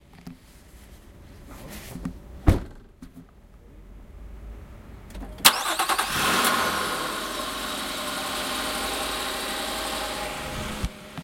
Car starting
nissan pathfinder starting. recorded using zoom h2n